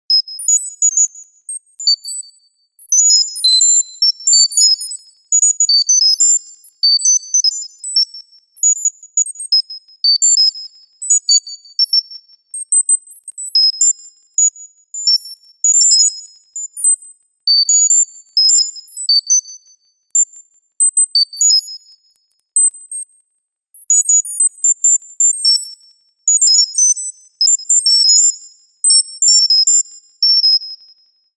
Some synthesized high-pitched computer beeps I created with Soundtrap.